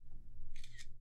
This audio represents when someone takes a photo.
Phone, Photo, Selfie